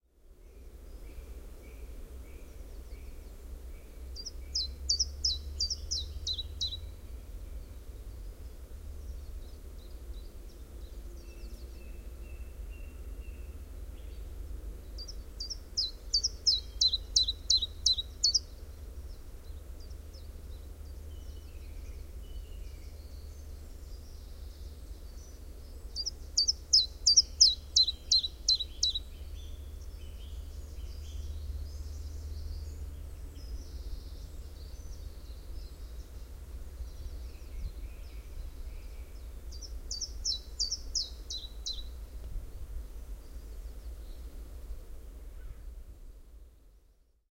Afternoon in a natural reserve with willow trees and swamp habitats. Calls of a chiffchaff or Common Chiffchaff, (Phylloscopus collybita) ,a leaf-warbler. Zoom H4n